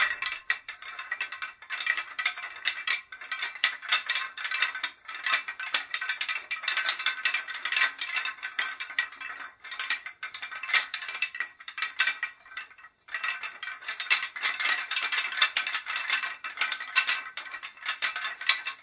Moving a wooden chain with four links in front of the mic. ZOOM H1.
ambient, atmosphere, chain, field-recording, in-door, rythmic, soundscape, wood